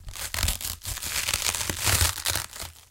Multiple cracks
Some gruesome squelches, heavy impacts and random bits of foley that have been lying around.
gore, violent, blood